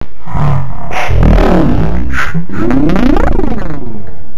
Weird voice sample.
voice, weird